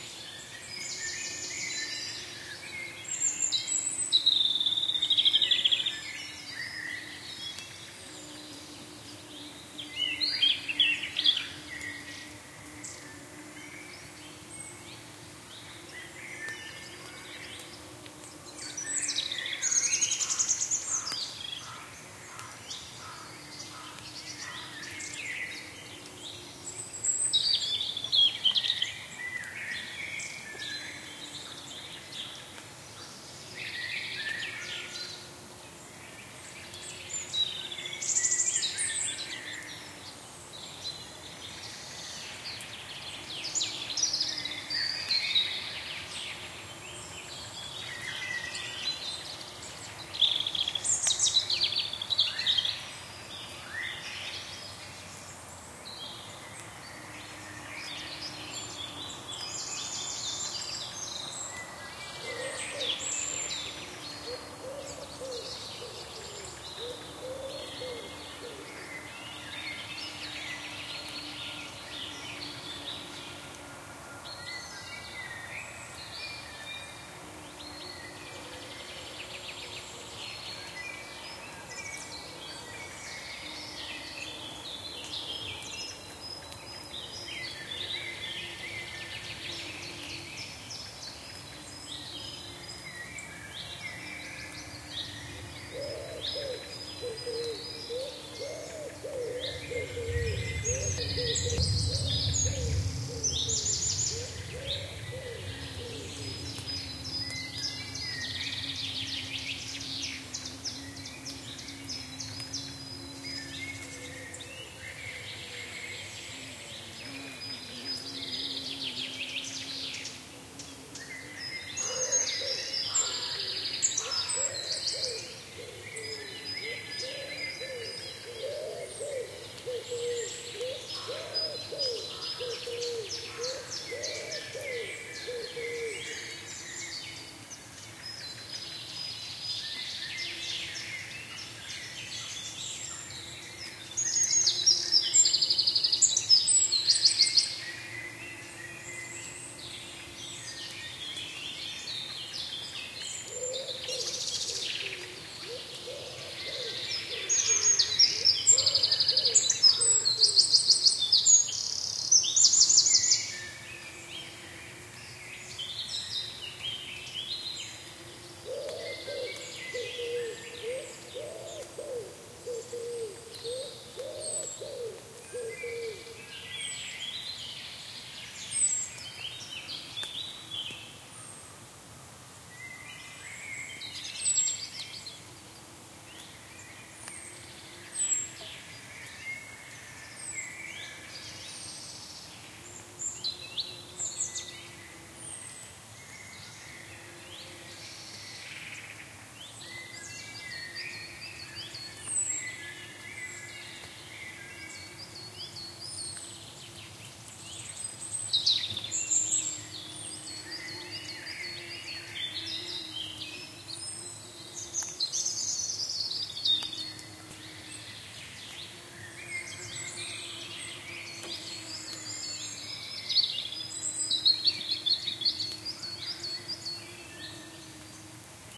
forest after rain 210429 0075
Forest birds singing after rain in april.
ambient, nature, birdsong, bird, ambience, ambiance, spring